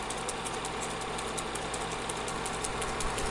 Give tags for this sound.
bell cinema ending film Howell movie projector reel video vintage

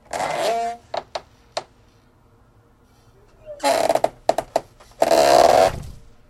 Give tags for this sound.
close closing clunk creak creaking creaky discordant door gate handle hinges lock open opening shut slam squeak squeaking squeaky wood wooden

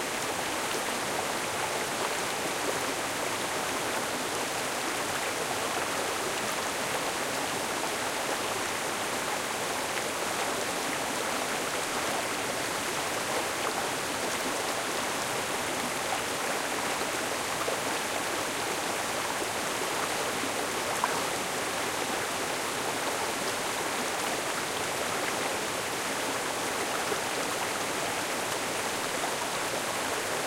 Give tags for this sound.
river brook small waterfall

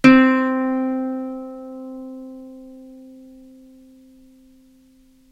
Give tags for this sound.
sample ukulele

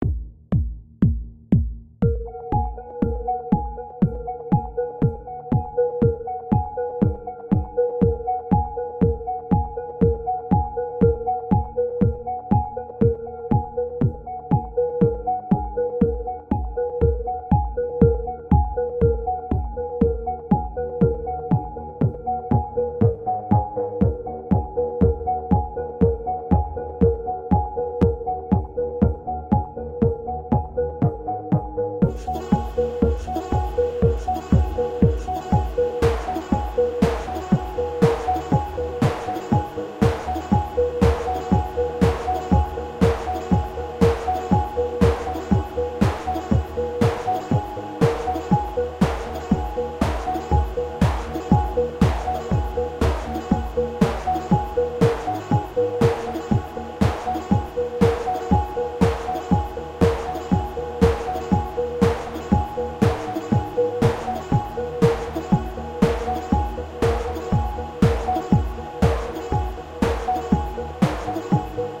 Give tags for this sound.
synth techno original bass trance beat melody loop progression flute Dance house kickdrum

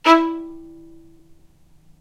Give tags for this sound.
spiccato violin

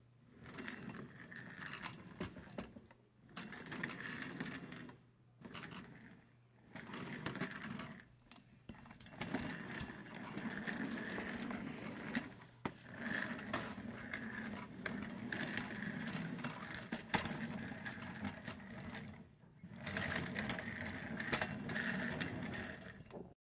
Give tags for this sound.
moving chair squak wheels

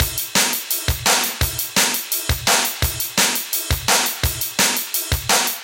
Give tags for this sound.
filter battery drums breakbeat loop

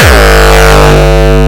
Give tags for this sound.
drum gabber hardcore kick

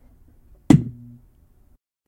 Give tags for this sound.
turn speaker

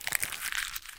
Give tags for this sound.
crumble paper papercrumble

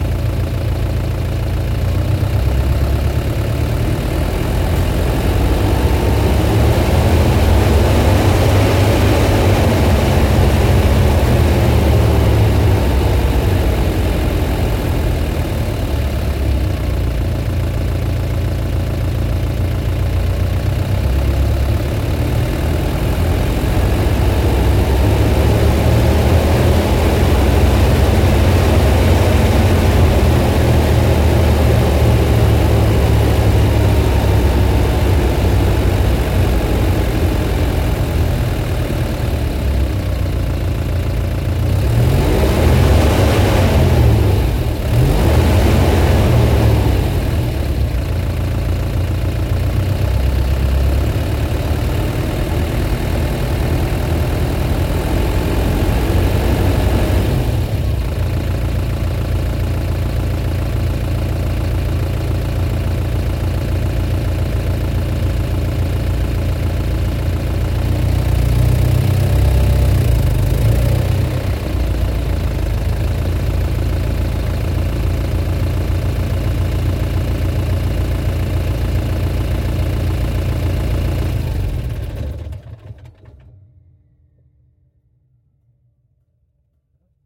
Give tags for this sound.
down car automobile diesel gear heavy off vehicle turn auto shut machine ramps machinery rpm engine truck neutral mobile tractor

